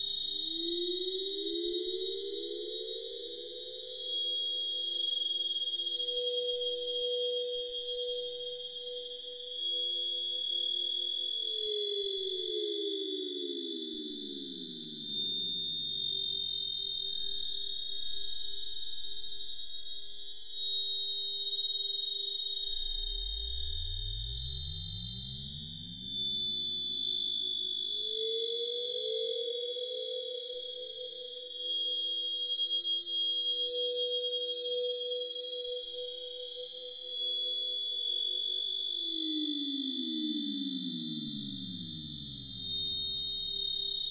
Eerie alien sound created with Alien Artifact VST synth and Ice FX filter. This is a seamless ambient loop intended for sci-fi games or creepy alien abduction horror movies. Part of my upcoming Alien Factory soundpack.
What is Ice FX? It's a special form of reverb filter which makes samples sound like cold ice, metallic hissing or whistling.